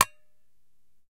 Striking a metal vacuum flask.